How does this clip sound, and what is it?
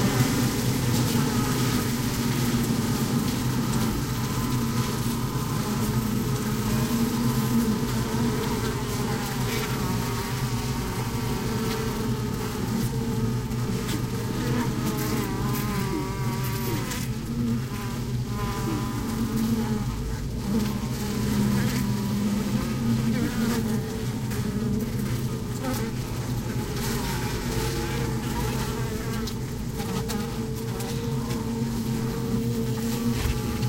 inside a beehive
During the varroa treatment of our bees, I used the disturbance to place the Zoom H2 for some seconds inside the hive.
Quite noisy and all the deep buzzing gives me a feeling of restlessness and threat.